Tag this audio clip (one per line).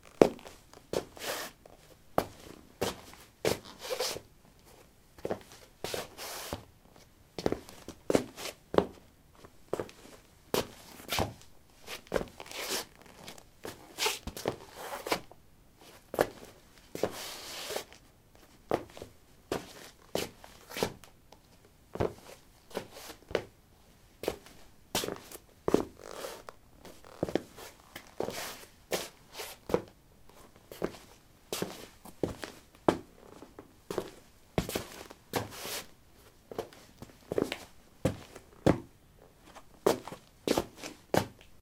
footstep
footsteps
step
steps
walk
walking